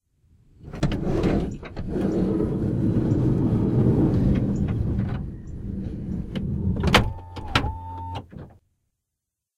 sliding door closing
sound of automatic minivan side door closing
field-recording, car, automotive